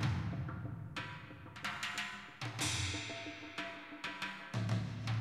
Percussion Drum Loop 01
This loop was created with the Mooer SynthDrum, Neunaber Stereo Wet and the EHX 22500 Looper.
Ambient, Beats, Drum, Loop